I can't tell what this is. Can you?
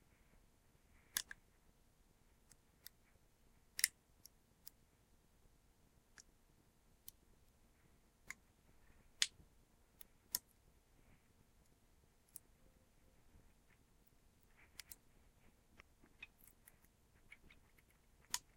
Crushing soda can 04
Me crushing a soda can with a seat clamp.
bench, can, clamp, crinkle, crush, press, seat, smash, soda